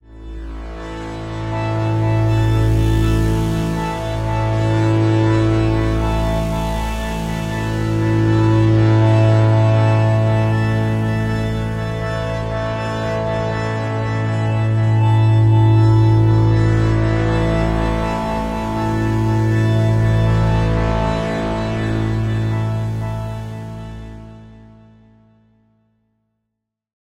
MonicPulse Pad
A pulsing pad I created in MetaSynth.
drone, evolving, ambient, space, soundscape, pad, artificial